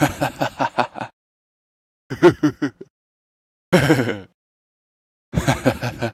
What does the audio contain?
Freak Laughing
Unedited, odd laughter. Needs a little restoration in parts, nothing major though.